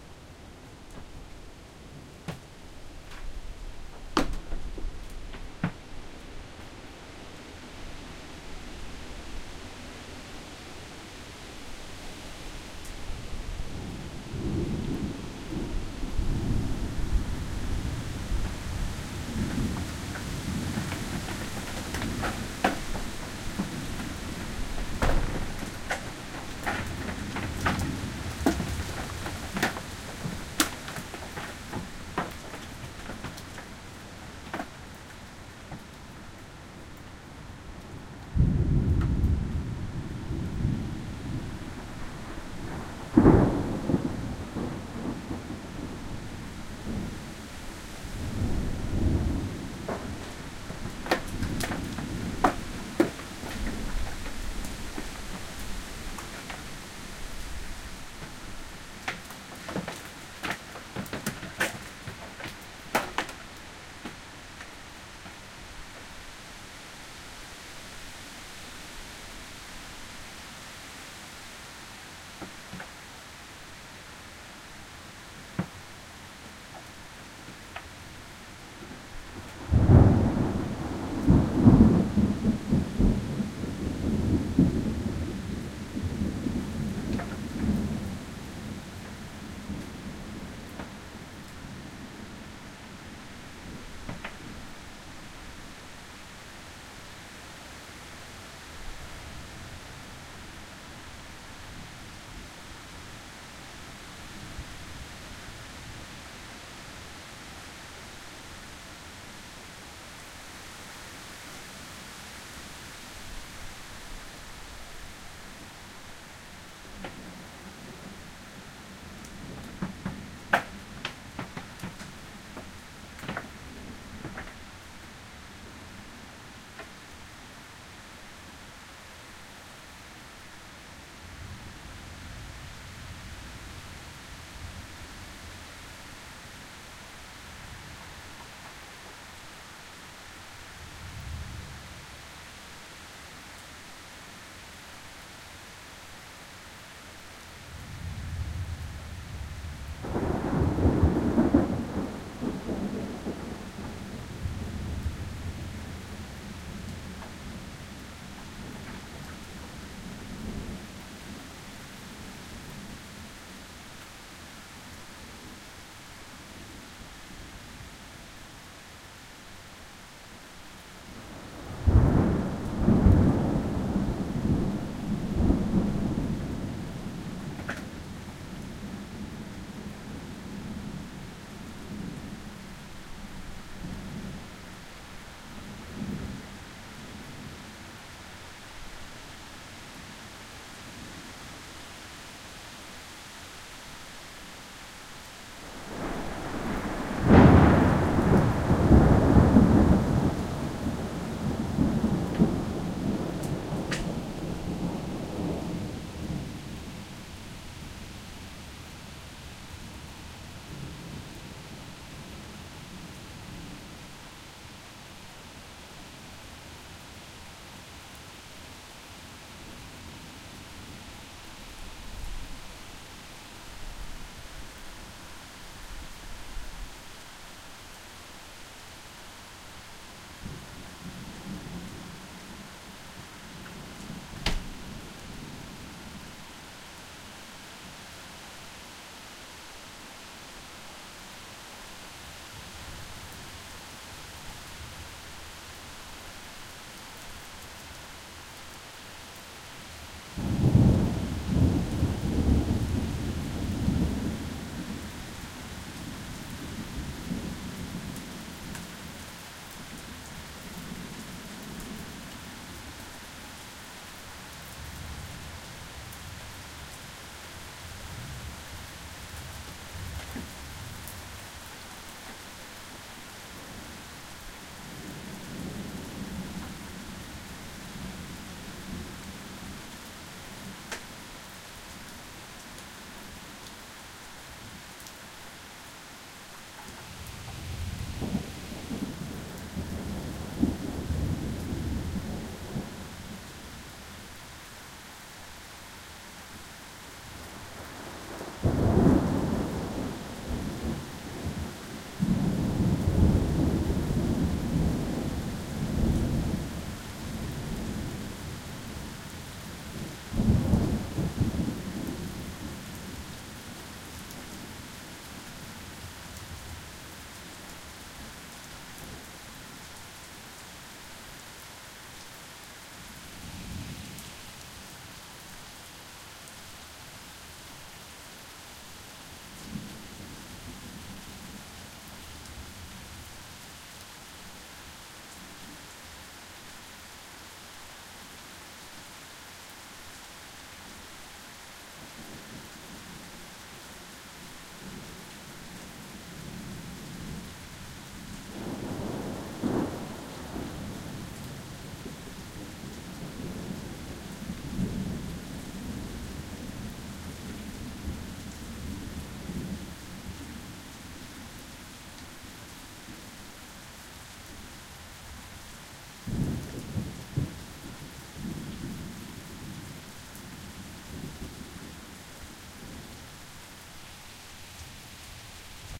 ohio storm
A short and strong thunderstorm passed over where I was staying. I stood on the porch and recorded it. The banging you hear is from large nuts falling on the roof from the wind.Recorded with the Zoom H4 on-board mics.
stereo, field-recording, rain, thunder, bang, wind, storm, geotagged, h4